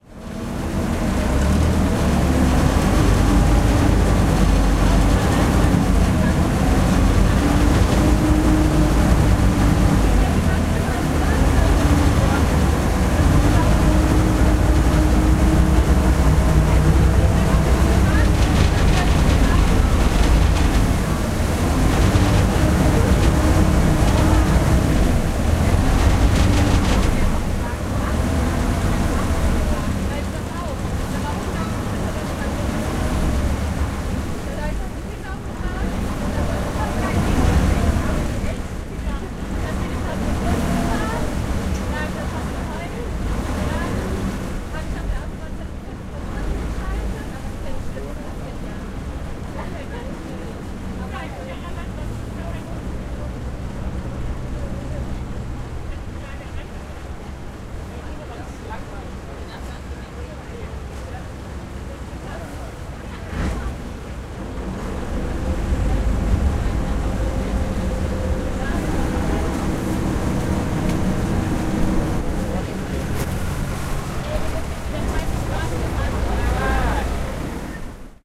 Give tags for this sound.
boat; ferry; motor; river; ship; water

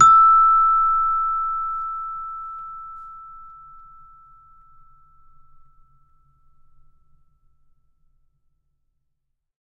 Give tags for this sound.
samples; celeste

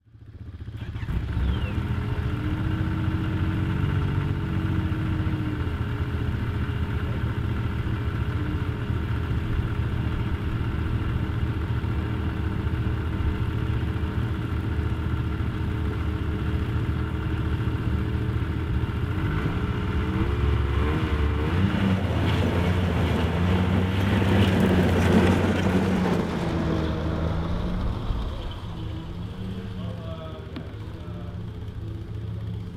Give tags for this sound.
pull; idle; slow; away; snowmobile

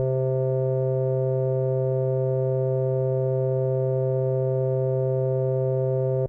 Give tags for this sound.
digital; electronic; loop; raw; sample; synth; synthesis; tone; tx81z; wave; yamaha

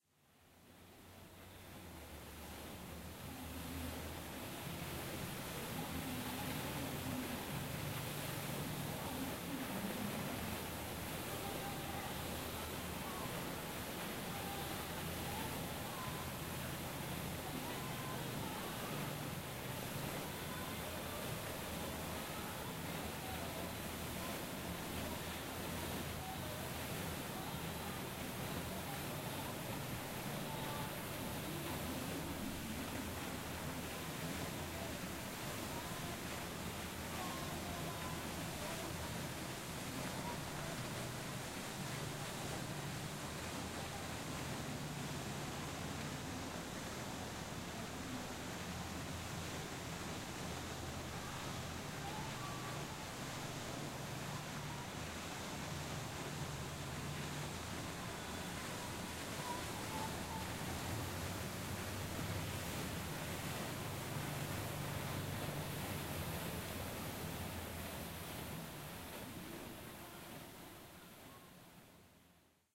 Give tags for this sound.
city; fountain; jfk-plaza; love-park; philadelphia; water

